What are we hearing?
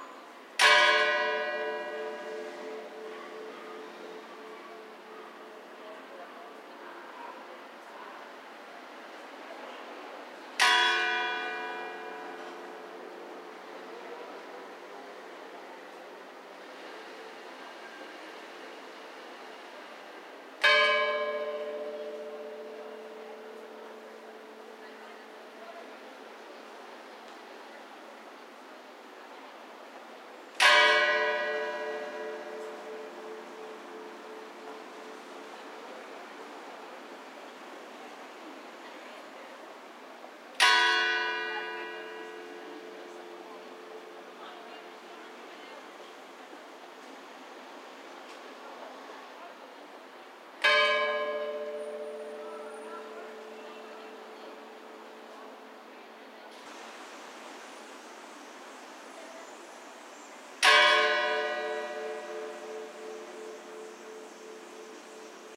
Church bell ringing sadly every 10 seconds on November 2nd ("All Souls Day", or Day of the Dead). Recorded with an ATR55 hypercardiod mic pointig directly to the bell, although lively traffic noise can be clearly heard in background (which is a double irony on the date, and the mic's features) / campana de iglesia tocando tristemente el 2 de Noviembre. Aunque esta grabado con un hipercardioide ATR55 apuntado directamente a la campana, se escucha claramente ruido de trafico al fondo...